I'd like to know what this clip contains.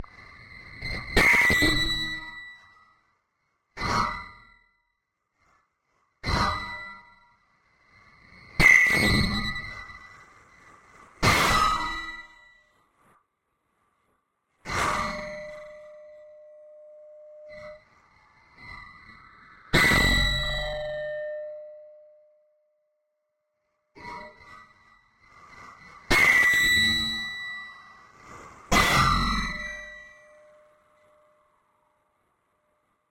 Heavy Steel Pipe 01 Terror Strike
The file name itself is labeled with the preset I used.
Original Clip > Trash 2.